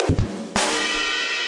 Ba Dum Tss
UI sound effect. On an ongoing basis more will be added here
And I'll batch upload here every so often.
1, 3-Octave, Ba-Dum-Tss, Generic, Third-Octave